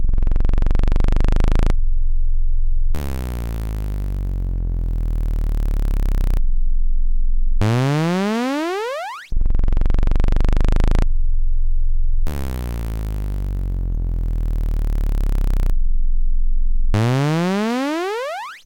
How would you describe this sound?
A weird, slow, bouncy sort of sound with lots of bass and some high frequency content too. Weird, annoying, who cares.
weird, nord, digital, bass, glitch, synthesis